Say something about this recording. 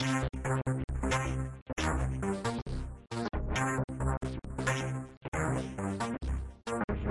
mod bass
bass loop with mod